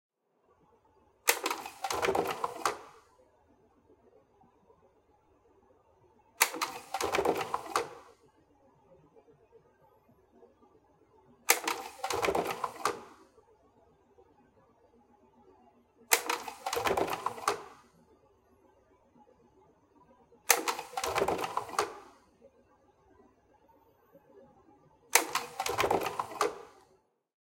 agfa-1 fanless
slide, agfa, projector, mechanical